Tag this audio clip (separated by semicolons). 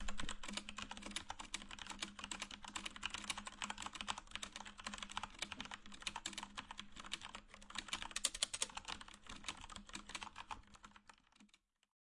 computer; keyboard; typing